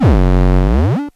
ET-1PitchMadness05
High to low to high note hit. Might make for a good bass? Recorded from a circuit bent Casio PT-1 (called ET-1).
circuit, circuitbent, lofi